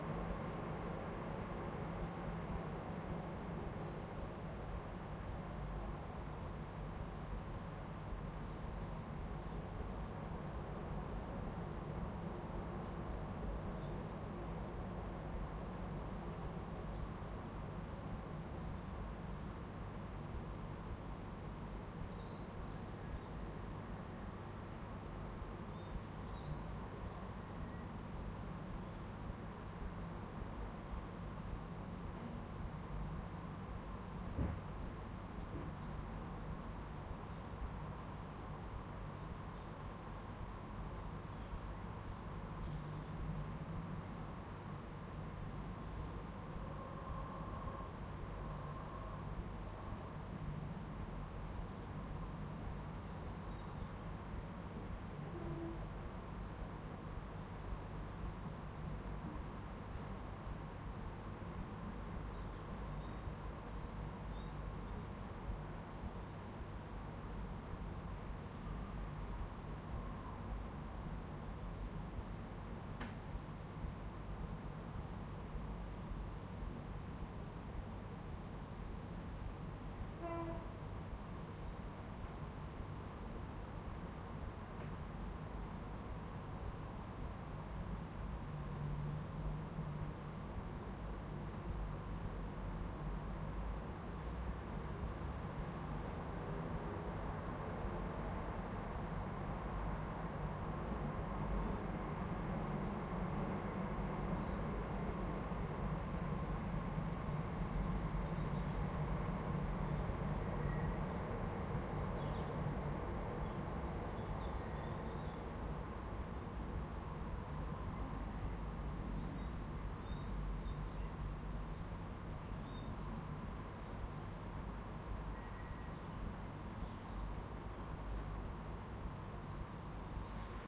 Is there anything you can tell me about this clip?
Suburban Residential Medium To Heavy Skyline
Garden, Residential, Skyline, Suburban, Traffic